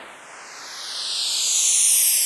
A sound made of square noise that fades in as if it sounded like a balloon inflating. Created using SFXR
arcade, balloon, computer, flatulation, game, gas, inflate, inflating, noise, retro, sfx, sfxr